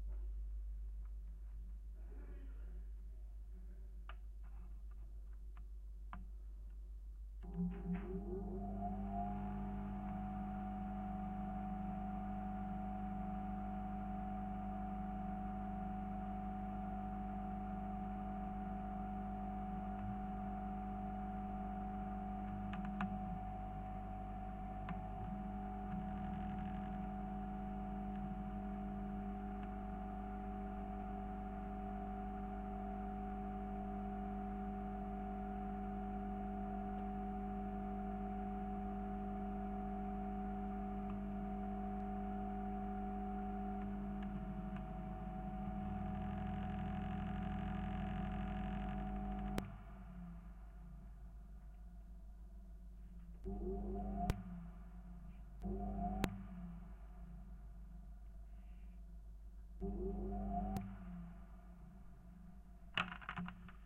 Solder fan vibration
Listening to the hum of a solder fume extraction fan while turned on.
contact-mic, electronics, piezo-mic, vibrations